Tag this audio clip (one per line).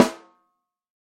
velocity
josephson
drum
e22
piccolo
multi
steel
pearl
snare
13x3
sample